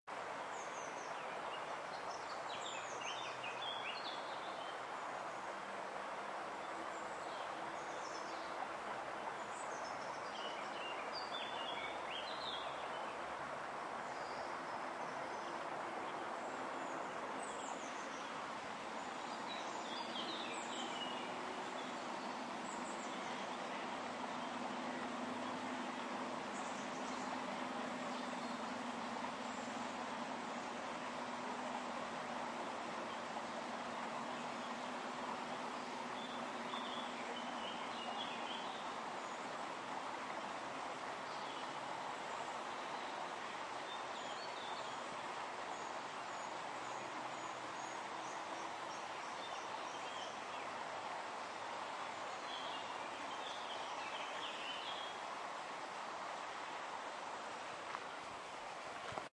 02 water birds cricket
field recording little processed in post, location is canyon of river Rjecina (mill Zakalj) near town Rijeka in Croatia
canyon, field, rjecina, birds, water, crickets